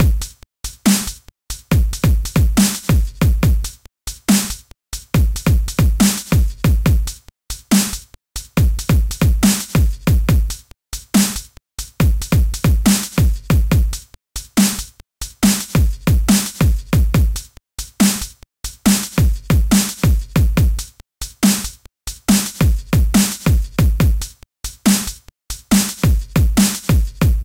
dubstep loop epic 140BPM
140BPM, loop, epic, dubstep